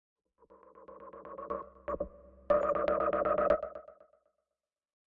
Whoosh StutterMuted ER SFX 13
chopped, transition, woosh, soft, choppy, swish, stutter, chop, whoosh, long, air, swosh